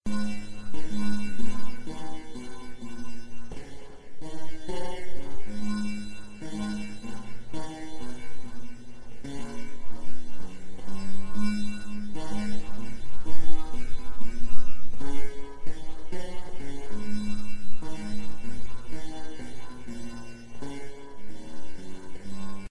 Melody line played on a Levin classical guitar. Recorded on an Acer 3692WLMI computer directly through the PCs mic.Altered in Cubase, stretched timewise, reverb added.Enjoy and embrace !!!!!!
guitar, jazz, laidback, melody